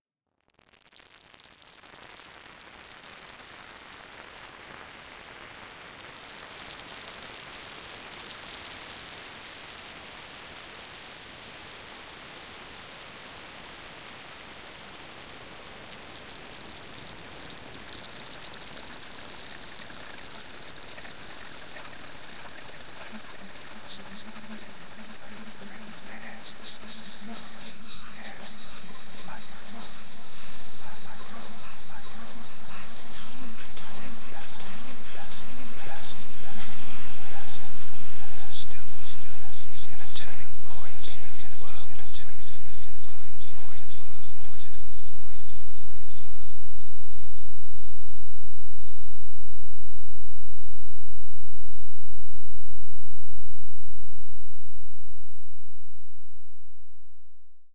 artificial rain made from granulated whispers condenses into recognisable speech fragments
air, granular, rain, voice